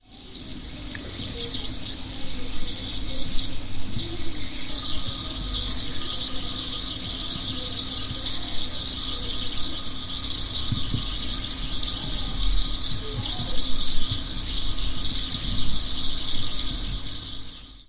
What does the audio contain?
Son d’un radiateur en fonte. Son enregistré avec un ZOOM H4N Pro.
Sound of a cast iron radiator. Sound recorded with a ZOOM H4N Pro.
bubble, bubbling, flow, flowing, gurgle, liquid, pipework, piping, radiator, stream, water